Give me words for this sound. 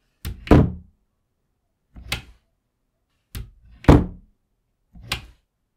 The sound of a cupboard door being open and closed. The door clicks open and closed as it uses a ball-bearing latch to keep it closed.
Recorded with a Zoom iQ7.